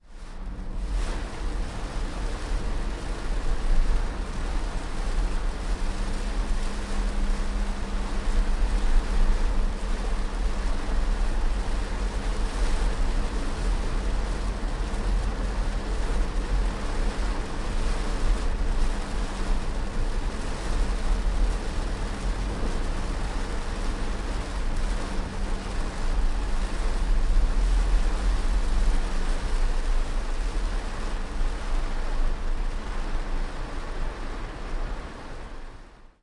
Raw audio of a heavy rain storm as heard from the interior of a Chevrolet car. The windshield wipers were on, as was the car itself, so you can hear the humming of the vehicle.
An example of how you might credit is by putting this in the description/credits:
The sound was recorded using a "H1 Zoom recorder" on 2nd August 2017.